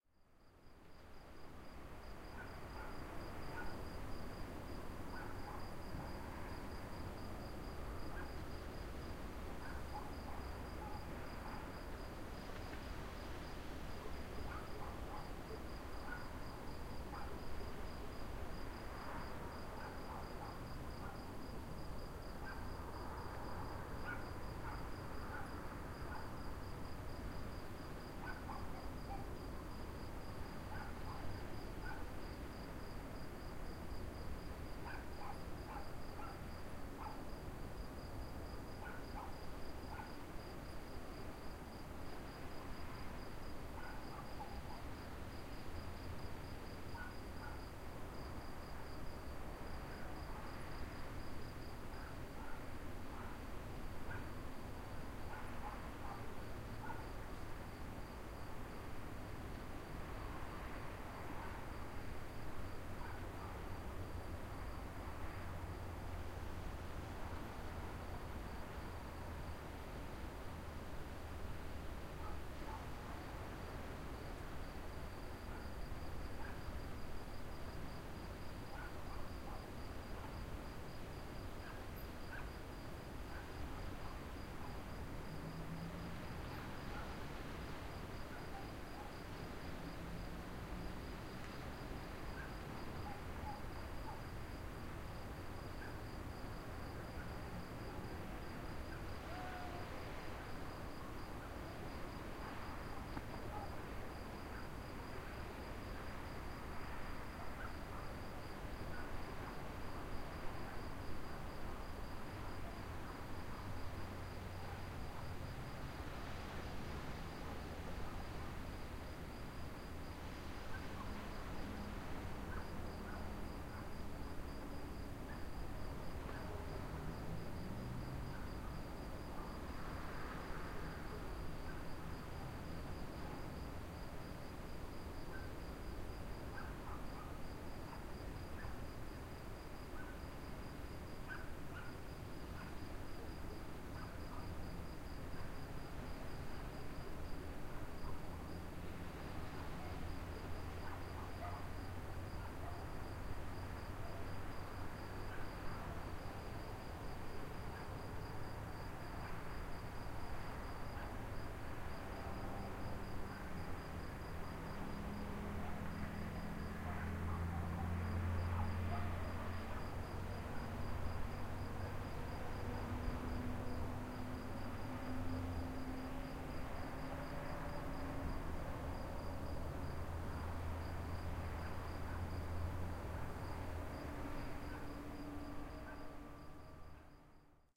Recording of the distant sounds of a Mediterranean village. You can hear the waves at your left, a distant road at your right, some crickets and a little dog barking. M-Audio Microtrack with its own mic.